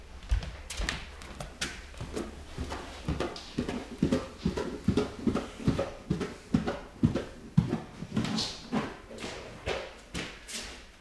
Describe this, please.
footsteps wooden stairs barefoot
walking on wooden stairs barefoot
barefoot, downstairs, footsteps, stairs, upstairs, walking